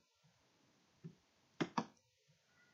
mouse click
On most laptops, you have to click things to make stuff happen. This captures it. I made the sound by using a particularly loud mouse (the cheapest laptops always have the loudest mice) and recorded it. This could be useful in any situation in which you need a sound but don't have the original sound or never had the original sound like an animation.
button, click, clicking, computer, mouse, press, short, synthetic